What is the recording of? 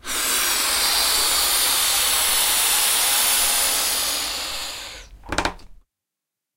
Balloon-Inflate-30-Strain
Balloon inflating while straining it. Recorded with Zoom H4